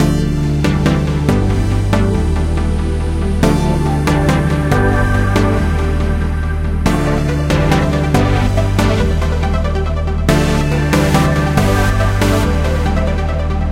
Melodic Synth for house, trance, etc.